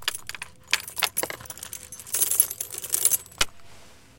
Car Keys Tinkling
The tinkling of keys shaking.
Recorded with Edirol R-1 & Sennheiser ME66.
car, clink, clinking, door, iron, keys, lock, metal, metallic, shake, shaking, tinkle, tinkling